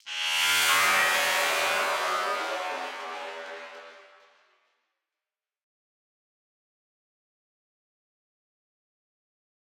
Spice shipment
A distorted filtered mechanical sweep FX
riser-fx, up, machinery, spice-shipment, mechanical-fx, distorted, space, dune-spice, dune, Ignition, machine, Synth-FX, mechanical, sound-effect, FX, spice, filted, riser